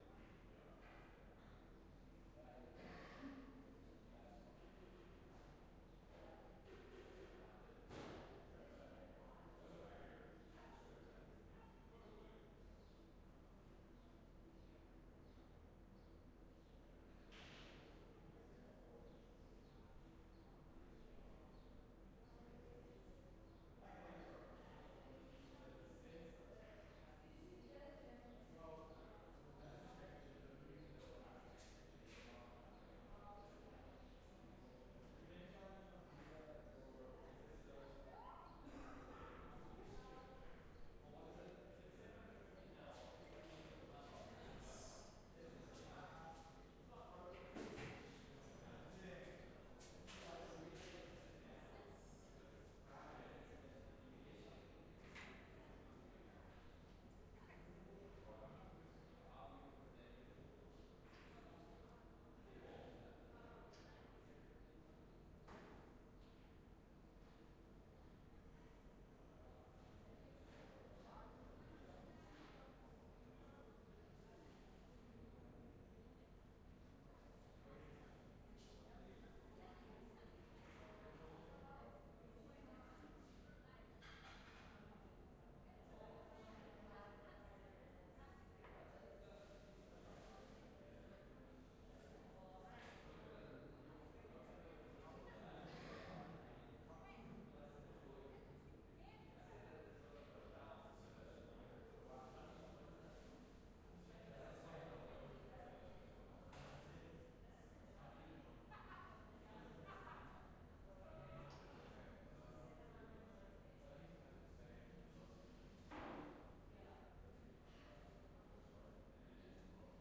classroom ambience
An open hall like classroom with glass doors. The reverb bounces the sounds from outside and from a near by kitchen.
classroom, Open